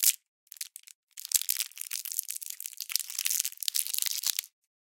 unwrapping a hard candy from its plastic wrapper.